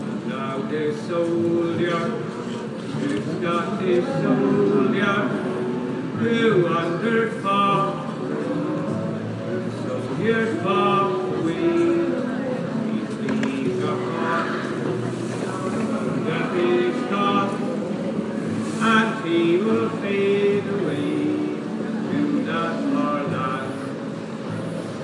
Scottish soldier street singer
Busker sings outside The National Museum Edinburgh. lots of street sounds
busker, singing, song, street-singer